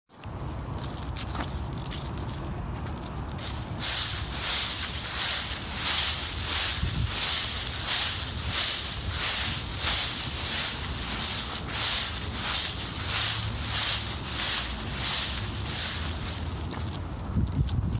walking through leaves